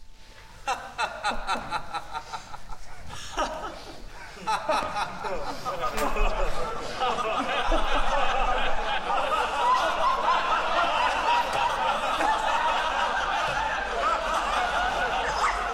BarbiereDeSevilia public HAHAHA
Peole in concert hall, laughing
Crowd, haha, Laugh